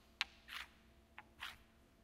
Rock on marble
marble, scrape, Rock